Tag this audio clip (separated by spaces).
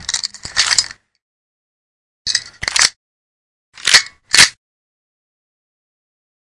weapon gun pistol handgun reload